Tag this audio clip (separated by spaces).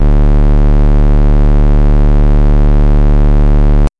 chiptune fuzzy square synth